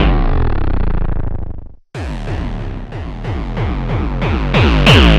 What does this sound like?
Heavy and Ohmy Bassline